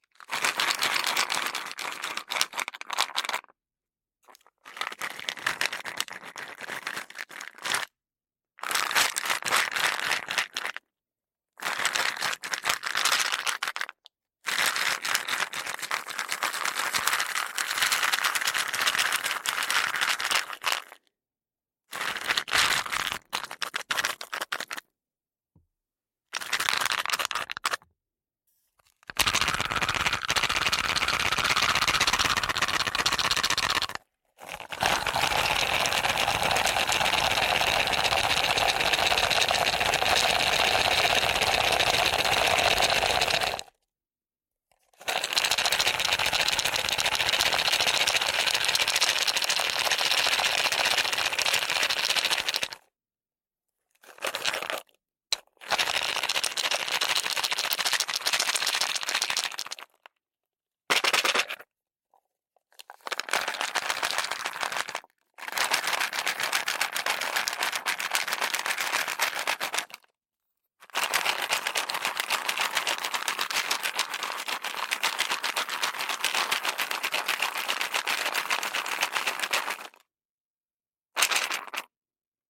This sound effect was recorded with high quality sound equipment and comes from a sound library called Props Box which is pack of 169 high quality audio files with a total length of 292 minutes. In this library you'll find different foley recordings.